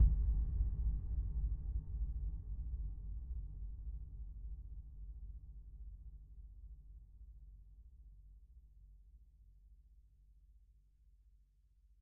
Created with: Music Forge Project Library
Software: Exported from FL Studio 11 (Fruity Edition)
Recording device: This is not a field recording. (Some VST might have)
Samples taken from: FL Studio 11 Fruity Edition
Library:
Patcher>Event>Ball>Basket ball floor very large room